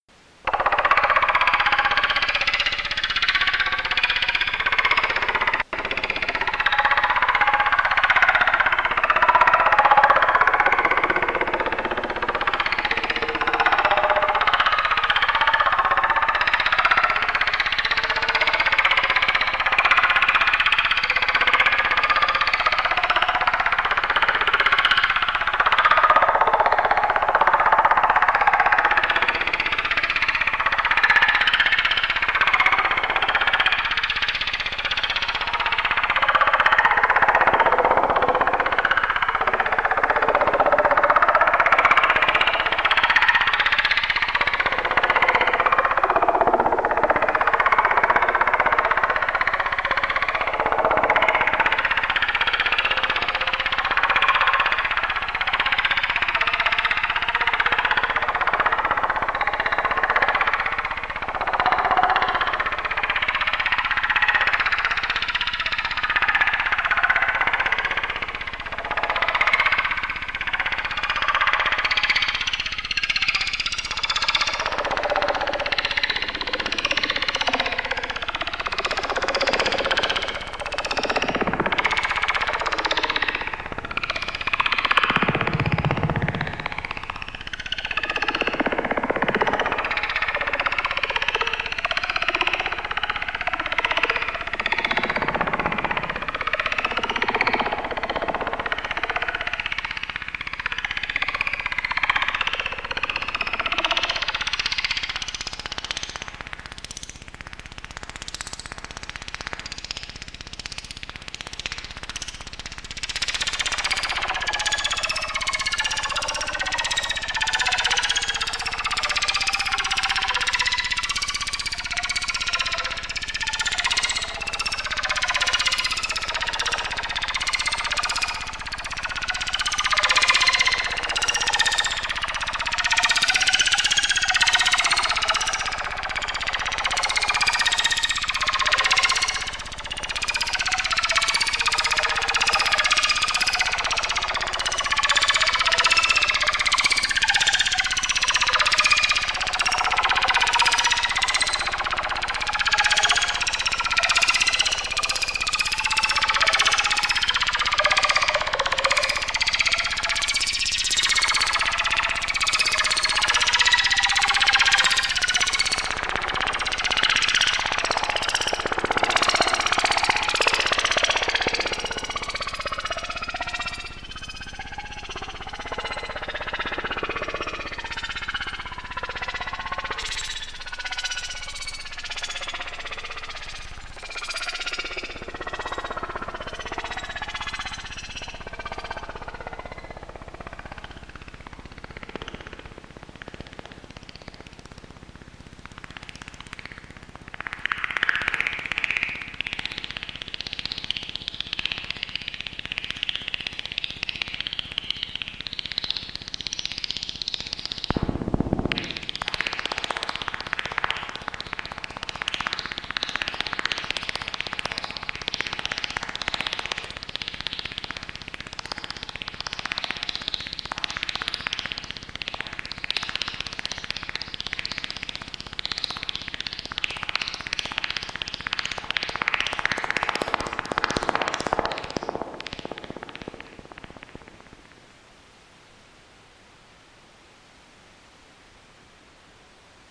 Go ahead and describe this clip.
dimpled stream
Granulation of Robin Hood's Horror Drone followed by arpeggiated triggering using a modulated filter on the Bass Station II
design, expansion, granulation, sound, time